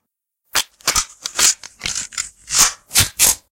Assault Rifle Reload
Recorded with Sony HDR-PJ260V then edited with Audacity